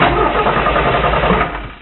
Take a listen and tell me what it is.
A car engine starts with belt squeal
car, engine, start